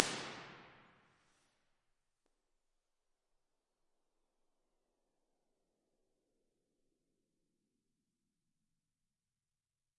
Finnvox Impulses - EMT 1 sec
impulse, response